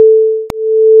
Vika02 Sine FadeOut FadeIn
Fading in and out signal
V
fade-record, signal-record, vika-recorindg